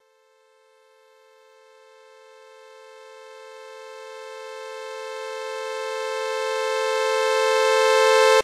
dark and cold
pad, reverse